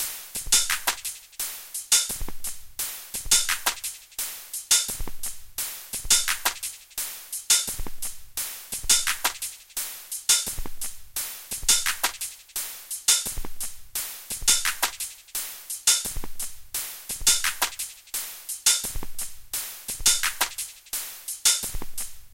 86/172bpm .. some hats and airy stuff in a loop.